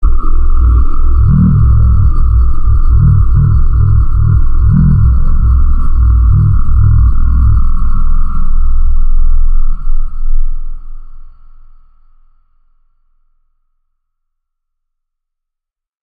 Realistic Alien Abduction
THE DARK FUTURE
Dark Suspenseful Sci-Fi Sounds
Just send me a link of your work :)